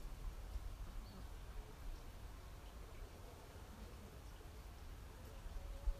Rural Wales light drizzle
A short snippet of light drizzle (rain) from Wales.